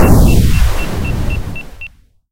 missile launch 2
White noise manipulated until it sounds like a rocket or missile being launched.
exhaust, missile, rocket